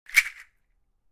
Seed Shellwav
This is a seed shell sound that I recorded
drums, Percussion, Seed, Shells